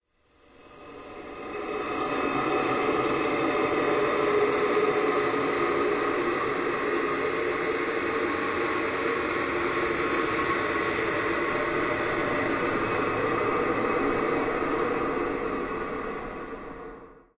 passing ghost2
noise weird strange fright spooky horror scary creepy haunted phantom ghost sinister
haunted, weird, noise, creepy, ghost, spooky, scary, strange, sinister, horror